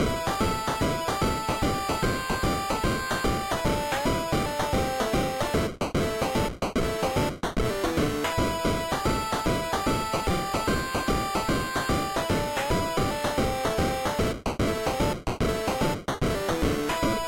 Video game music loop (Adventure)
Video-game 8-bit music loop. Reminds you of going on a huge journey. Great for video games, animations, and others. Made in BeepBox.
I named this one "Adventure" due to the loop's beat reminding me of them.